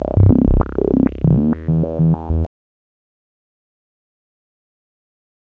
SH-5-264 098bpm
Sample and Hold + VCF and manual filter sweeps
synthesizer, filter, hold, sample, roland, sh-5, vcf, analog